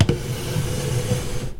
A sound of a chair which I am dragging on the floor.
chair, drag, pull